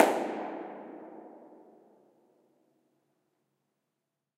Tunnel 3 Impulse-Response reverb low pitch